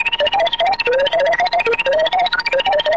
sonokids-omni 09
abstract, analog, analogue, beep, bleep, cartoon, comedy, computer, electro, electronic, filter, fun, funny, fx, game, happy-new-ears, lol, moog, ridicule, sonokids-omni, sound-effect, soundesign, space, spaceship, synth, synthesizer, toy